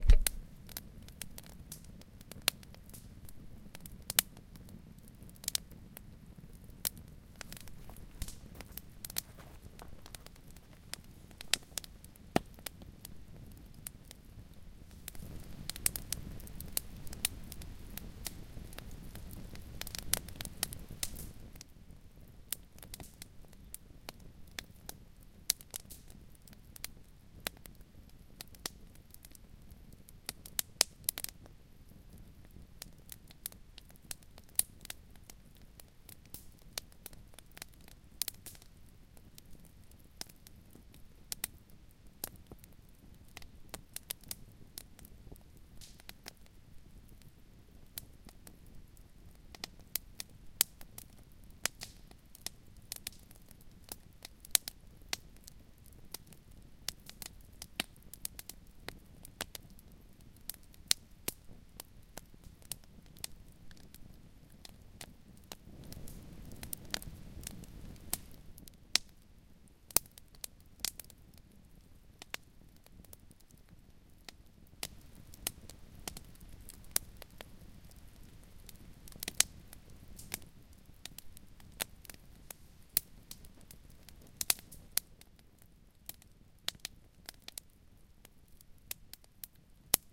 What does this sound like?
Camp Fire 1
Field-Recording of a campfire Rimrock WA. Recorded on Zoom H1 at 96/25.
Field-Recording, Fire, Campfire